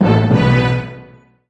Stereotypical drama sounds. THE classic two are Dramatic_1 and Dramatic_2 in this series.